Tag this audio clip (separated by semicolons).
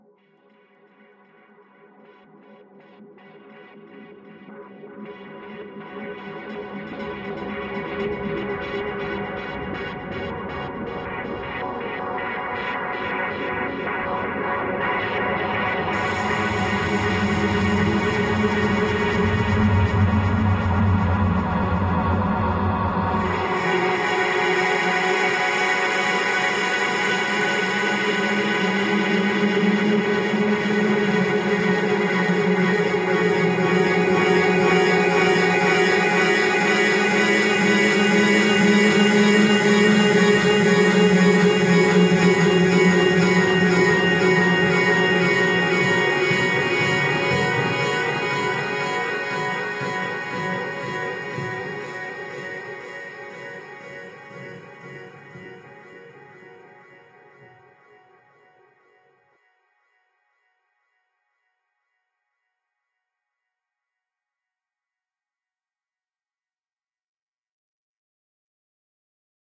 Space; Atmosphere; Soundscape; Drone; Experimental; Ambient